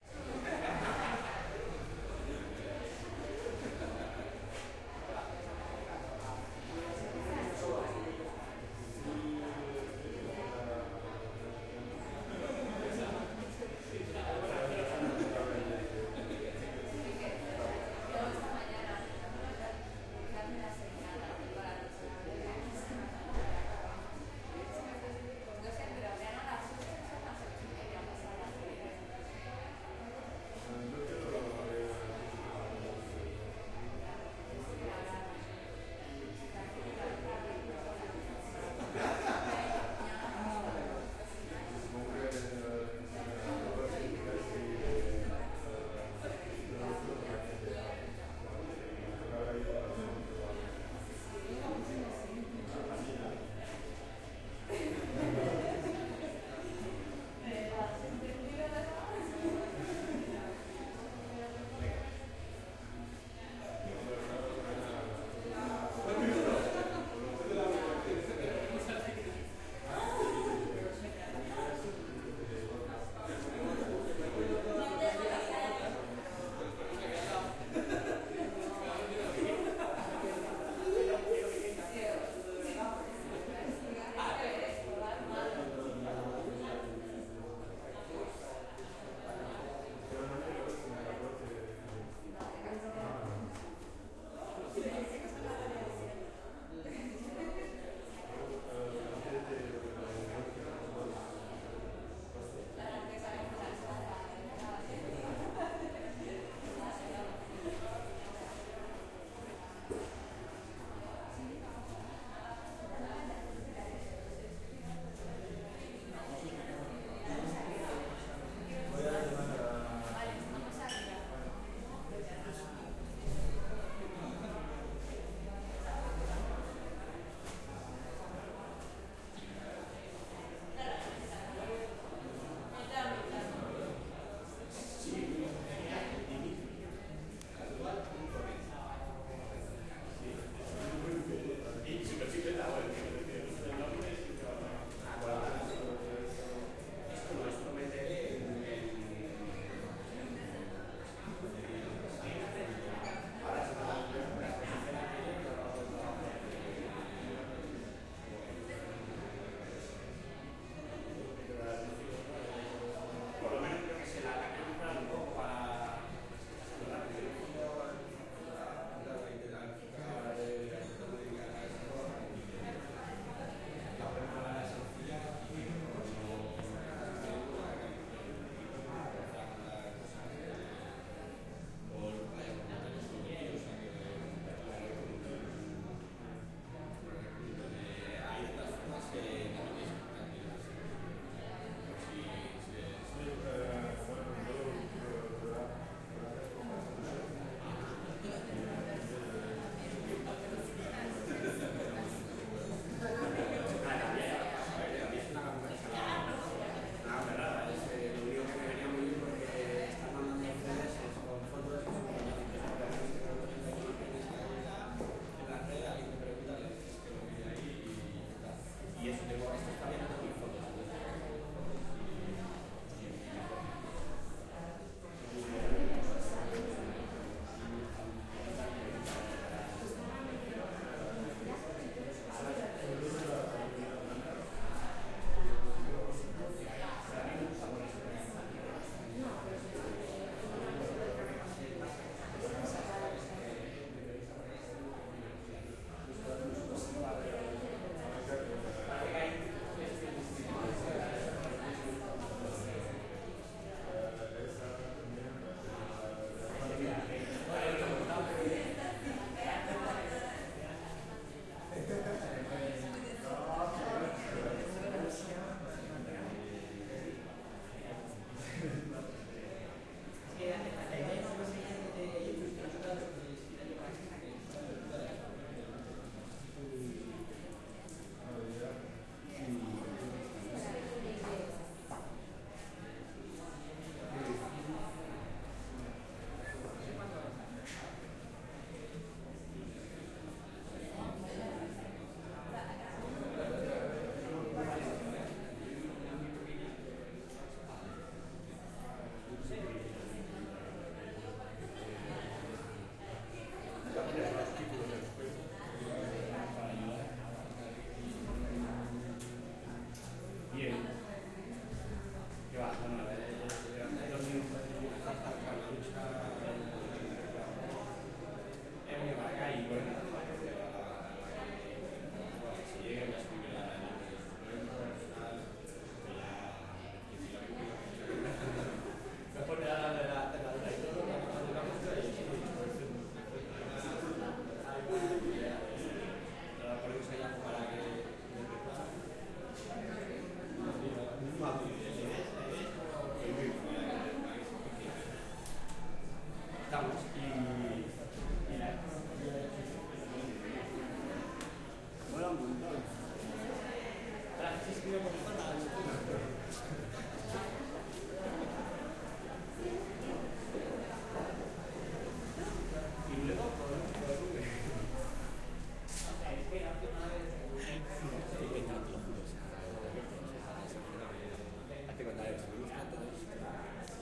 Opening of "Vivo del cuento" by elDymtitry in Miscelanea.